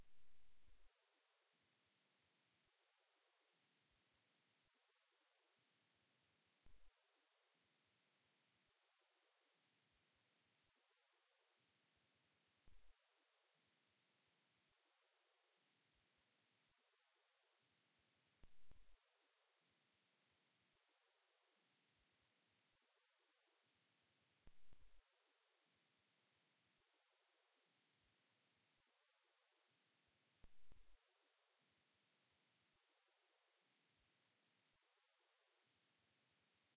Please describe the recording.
After clicking on Pause, this sound persists in the Headphones... Don't know why... But it sounds interesting
sound, darkness, modulate, glitch, effect, noise, cavern, sorrow, ambient, shape, dull, bug, fx, click, lo-fi, sfx, odds, cavernous